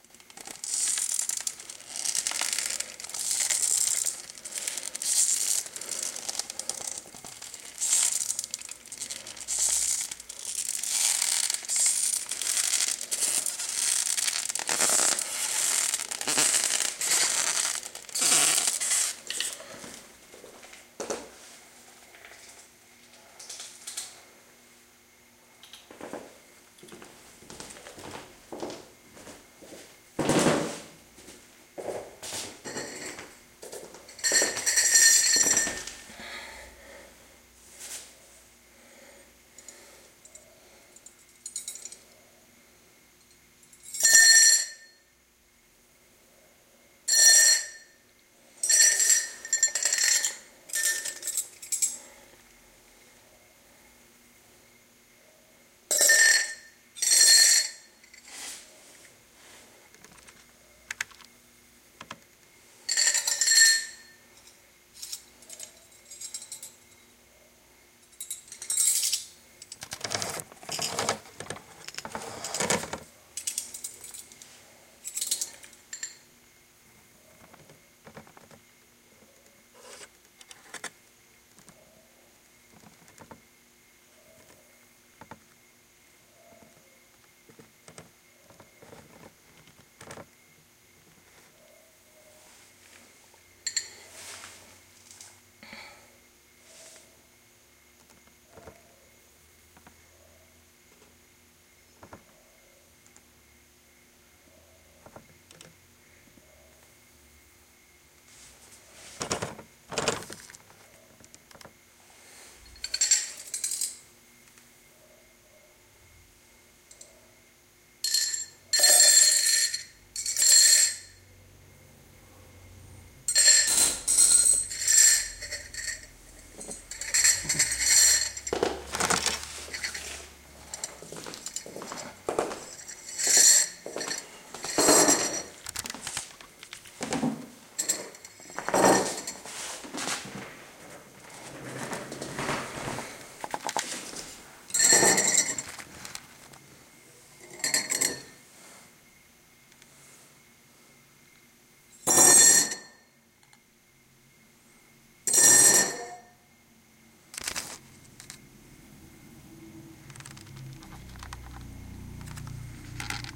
Wood crush
I made this sound to talk about some weird sounds made of wood. It´s just me twisting a wooden basket.
Dunno if it´ll help anyone xD
wood, horror, screech, crush, mistery, chair